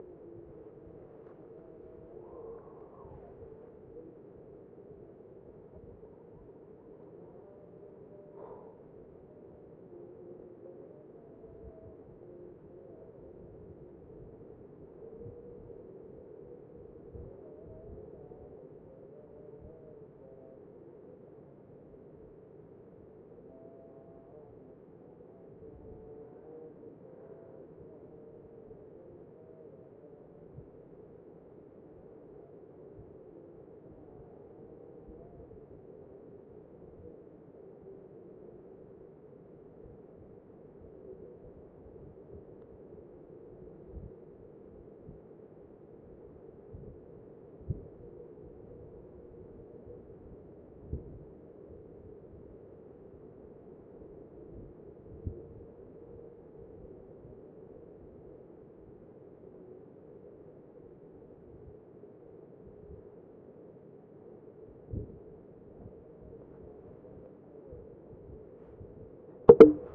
The call to prayer (Azan) at first light, as heard from high on the slopes of the volcano Mt. Merapi on the island of Java, Indonesia. Recorded November 2013.